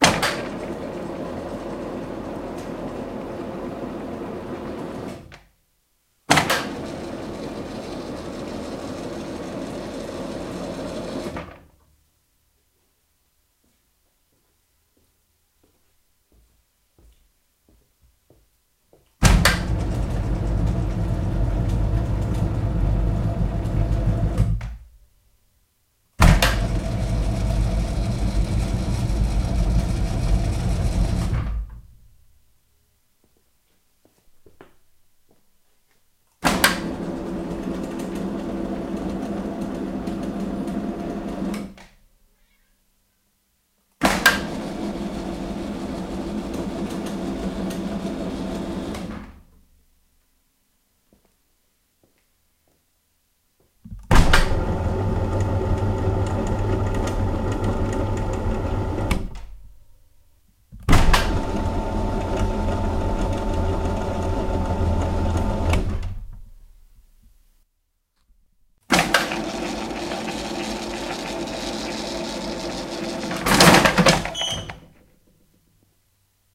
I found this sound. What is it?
mrecord21 lift raws
The raw recordings of a car elevator from different point of views.